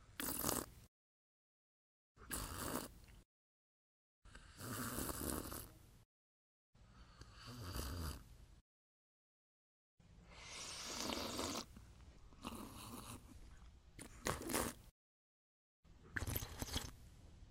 Sipping Slurping
Sipping liquid out of a mug.